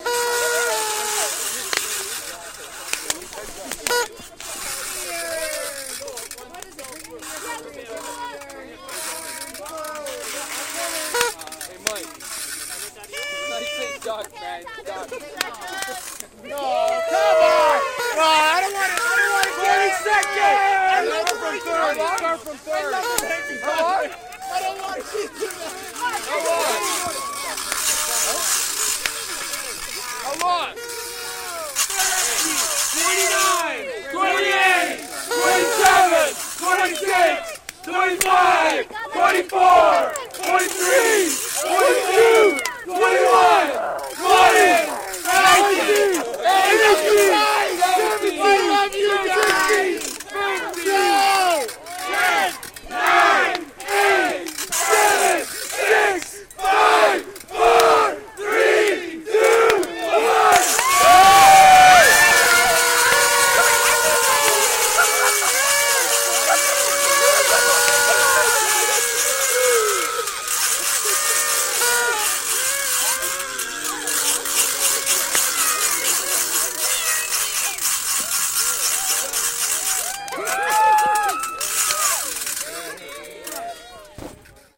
New Years, yelling, noisemakers, count down, distorted CEDARWOOD Lake Muskoka, 000101
New Years Eve countdown, outside, cold, small crowd (1999/2000). MiniDisc recorder with Sony ECM-DS70P.